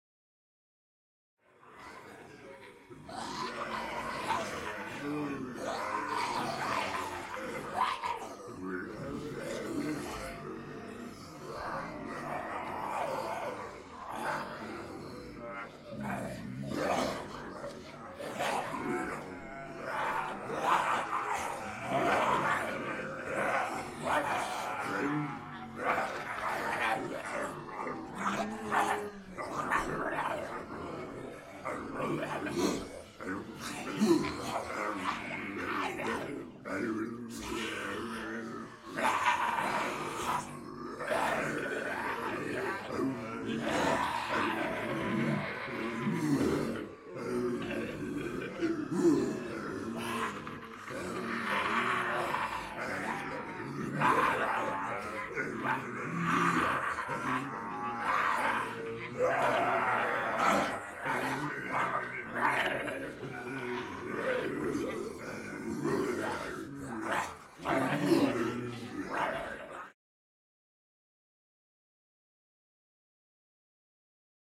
Zombie Group 3B
Multiple people pretending to be zombies, uneffected.
zombie, snarl, voice, horror, monster, group, solo, roar, dead-season, undead, ensemble